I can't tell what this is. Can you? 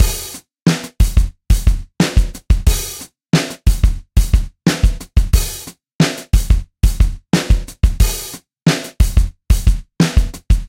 Drumloop with fx processing